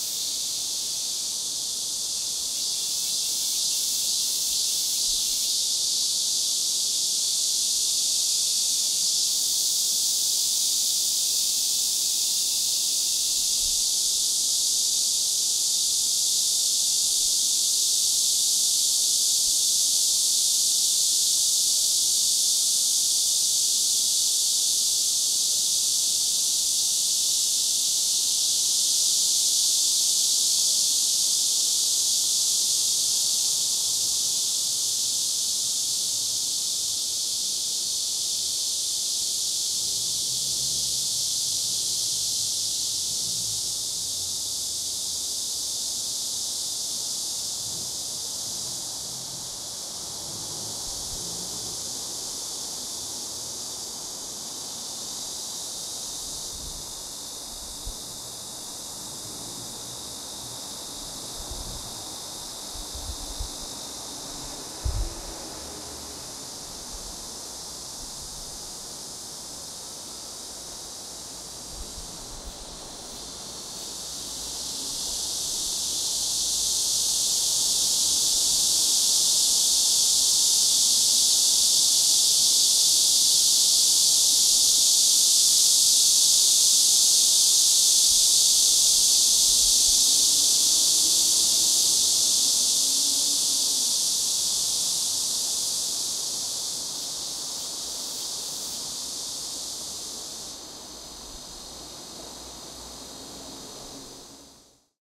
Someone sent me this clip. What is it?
Korea Seoul Crickets Some Traffic
seoul, rural, field-recording, cricket, crickets, daytime, korea, cicada, southkorea, south-korea, insects, korean, chirping, city